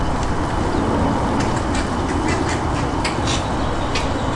Quiet calls from several Roseate Spoonbills. Recorded with a Zoom H2.
aviary, bird, birds, exotic, field-recording, spoonbill, tropical, waterbirds, zoo